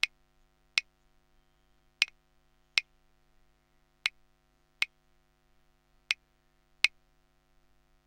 Claves (wooden blocks) played by me for a song in the studio.